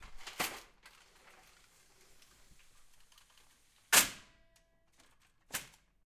Closing a sliding gate. The gate locks.
sliding-gate, closing-gate, OWI, locking-gate, slide, gate, lock